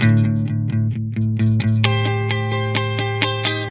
electric guitar certainly not the best sample, by can save your life.
electric, guitar